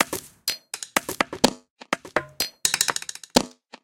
WoodenBeat 125bpm03 LoopCache AbstractPercussion
Abstract Percussion Loop made from field recorded found sounds
Abstract, Loop, Percussion